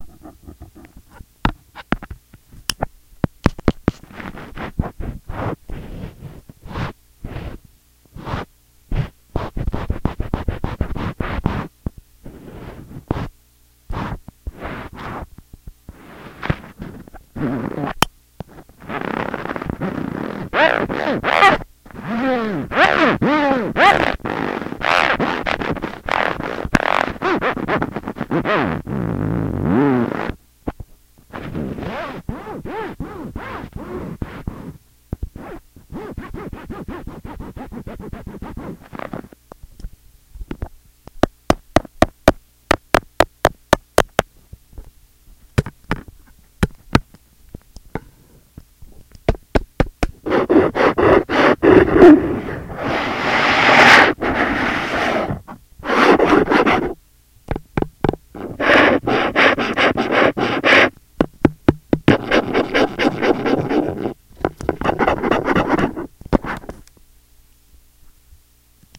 touching a plastic ruler
ambient, contact-mic, perception